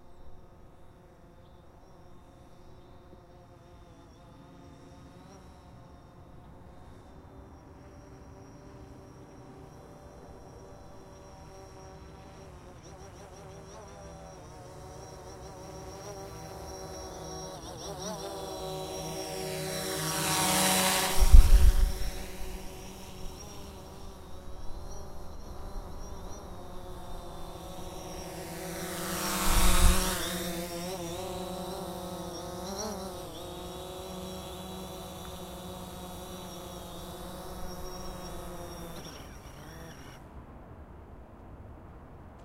UAS Drone Pass 06
Field recording of a DJI Phantom 4 Pro flying by. Recorded with a Tascam DR-40.
drone, drone-flying-by, field-recording, flying